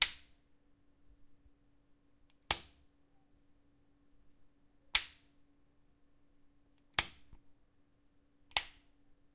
Another recording of lamp-buttons.